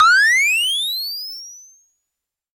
EH CRASH DRUM77
electro harmonix crash drum
crash, drum, electro, harmonix